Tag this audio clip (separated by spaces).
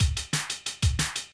jungle drum-and-bass drum breakbeat break